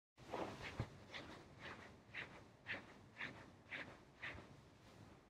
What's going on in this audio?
Bear Desert walk
Bear walking/running in the desert.
walk, desert